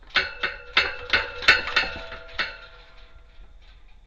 Shaking Gate (slowed)
Shaking a metal chain link gate. SonyMD (MZ-N707) Slowed down in Sound Forge to sound BIGGER.